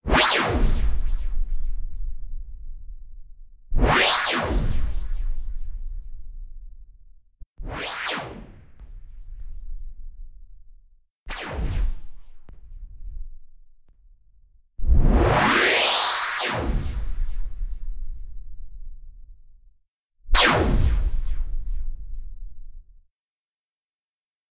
Woosh sfx synth
Woosh sounds short, long, stereozied, dynamic Doppler effect. It will be usefull for some movies and for who seraching some unique sounds. It wasmade with my fantasy. The lenth of the sounds is chosen with idea of the maximum useness. Made with synth.
Doppler, dynamic, effect, long, sfx, short, sounds, stereozied, Woosh